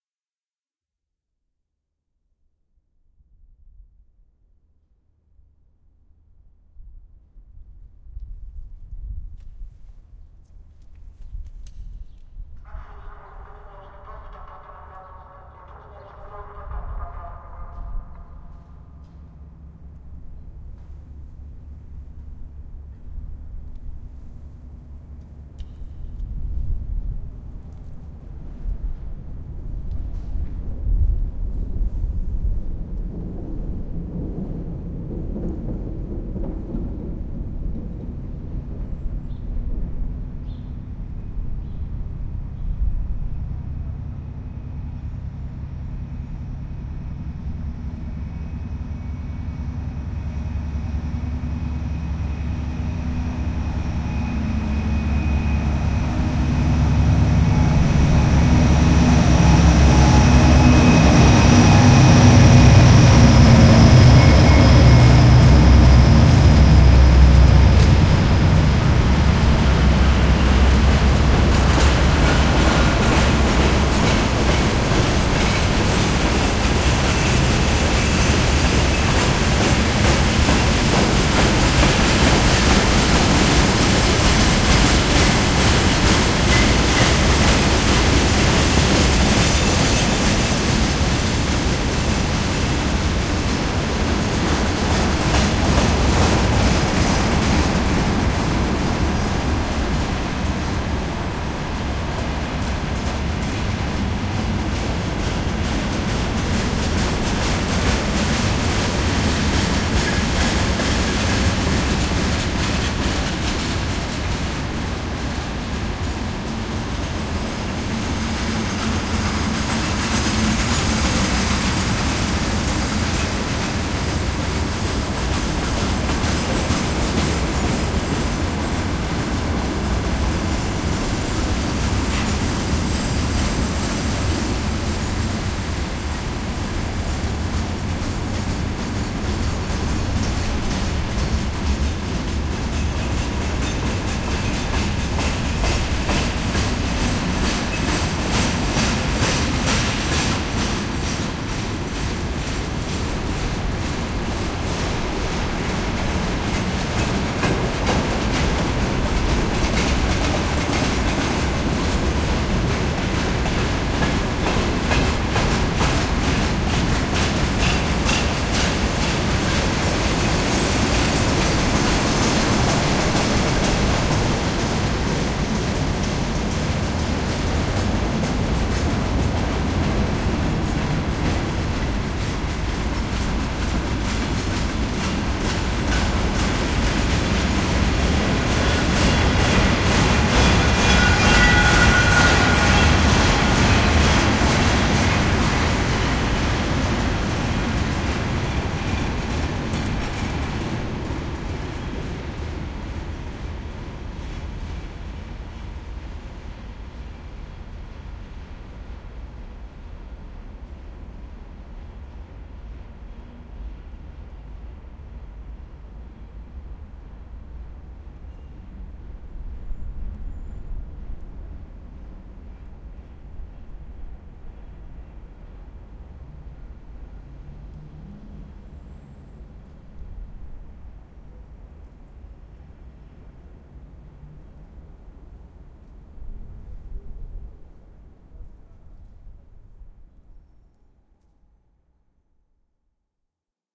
Long sound of the passing train.Recorded in the vicinity of the train under the bridge. On top of the bridge, sounds of the city, cars and trams.